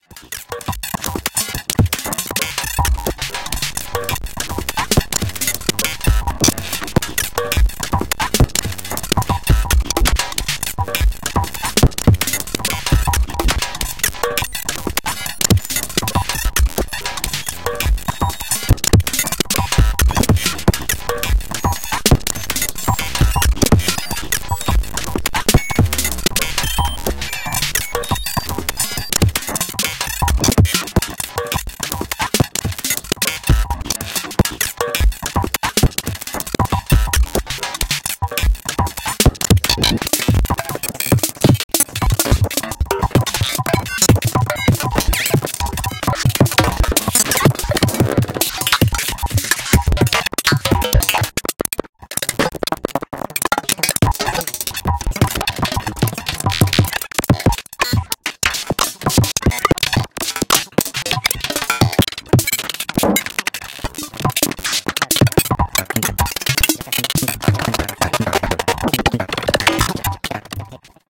An old sample created with a tool I developed with Max/MSP called "Smooth Otter"
digital, grains, granular, noisy